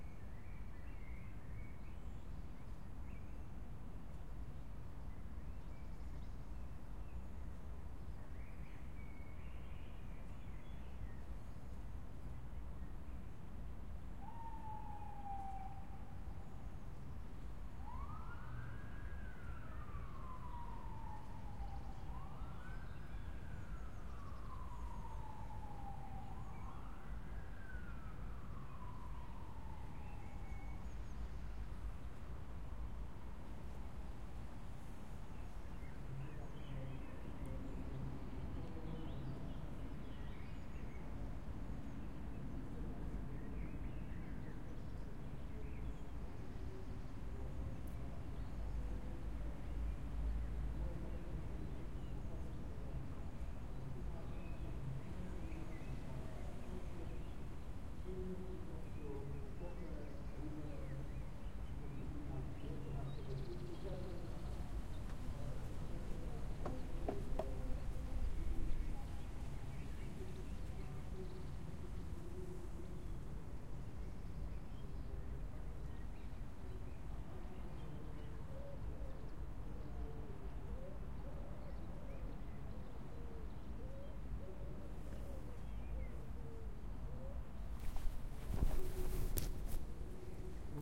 SE ATMO distant sirens, city Olomuc
background, ambiance, background-sound, atmos, general-noise, atmosphere